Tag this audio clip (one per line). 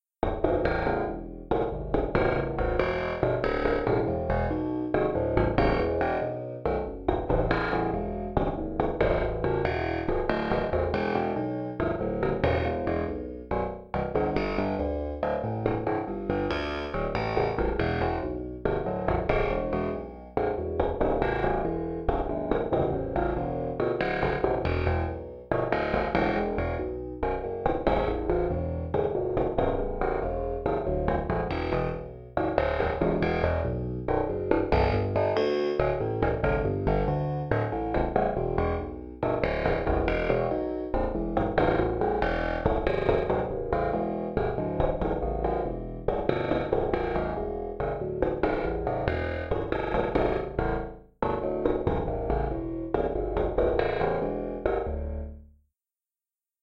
ambient
bpm-140
electro
electronika
elektro
loop
modern
music
new
synth
techno